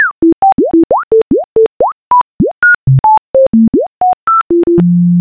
Retro Sci Fi Computer
"beep beep boop boop" - the sounds of an advanced computer, in the spirit of an old retro sci fi movie. Created in Audacity with the raw 'Generate Tones' and 'Chirps' functions.